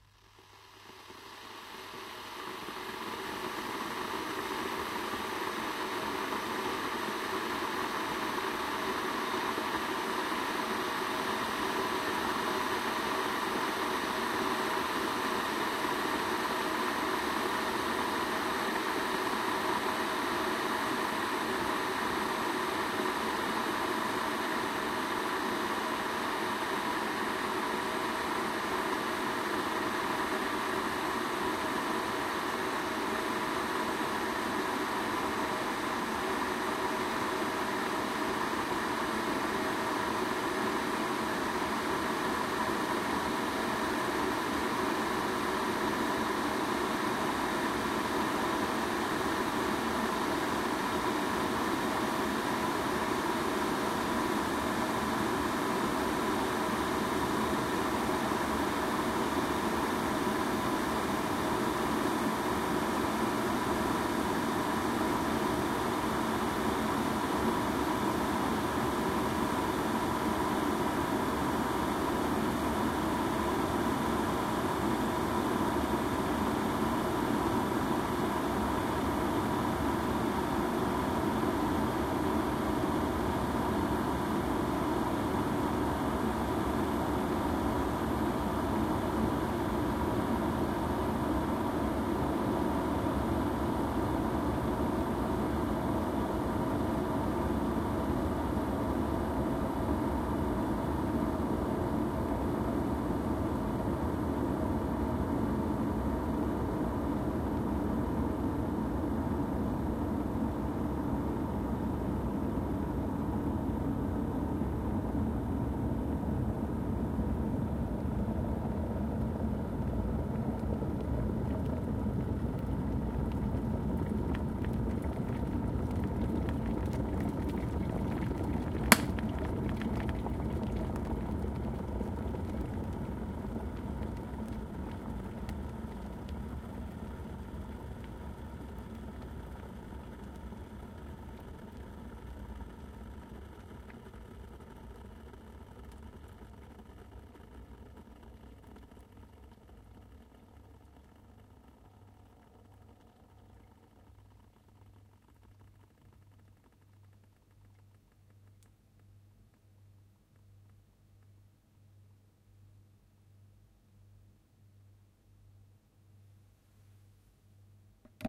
Hervidor de agua
ZoomH2n, Domestic, Field-recording, Hervidor, Sound-design